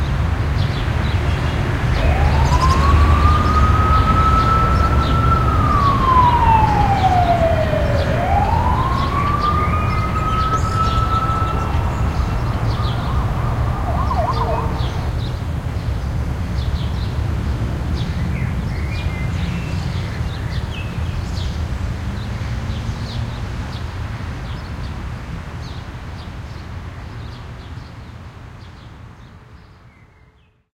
sirens passing a city park. made for class.
ambient, city, park, siren, traffic, trees